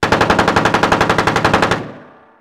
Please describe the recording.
Sounds recorded by me for my previous indie film. Weapons are live and firing blanks from different locations as part of the movie making process. Various echoes and other sound qualities reflect where the shooter is compared to the sound recorder. Sounds with street echo are particularly useful in sound design of street shootouts with automatic weapons.
Weapon ID: Heckler & Koch MP5A3 - 9mm